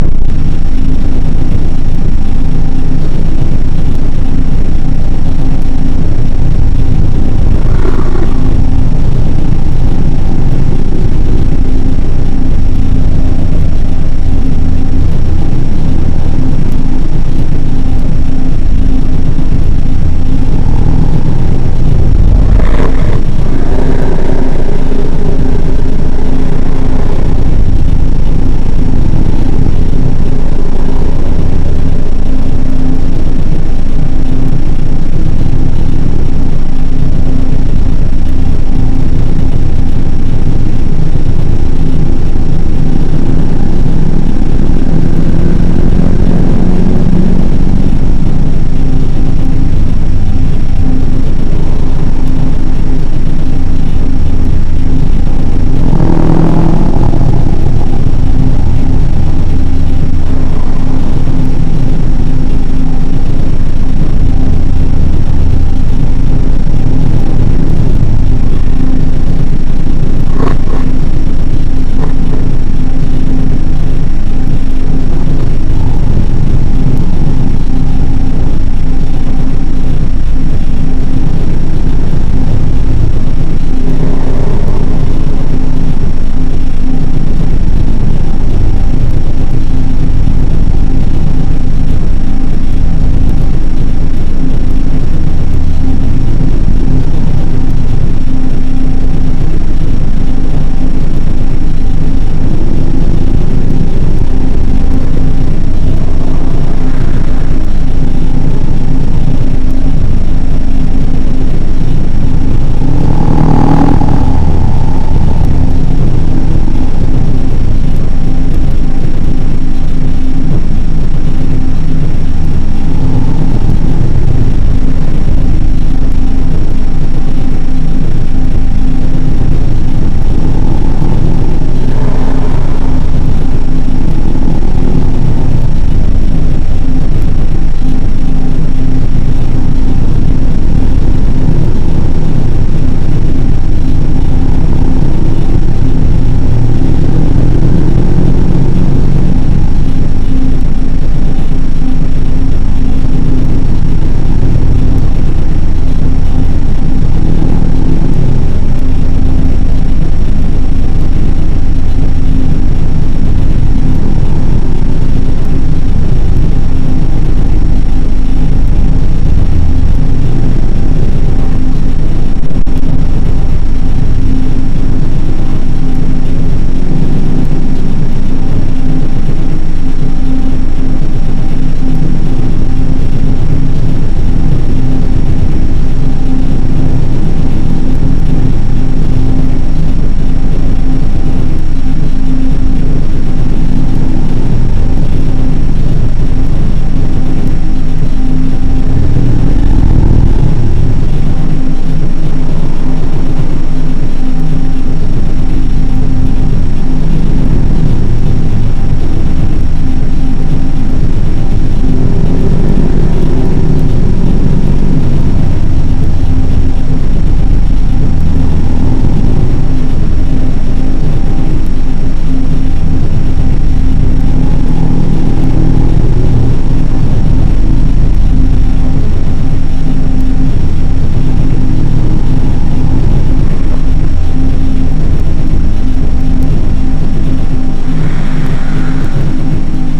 tape emission 5
processed drum machine and vocal recording through a modified sony tcm-200dv cassette recorder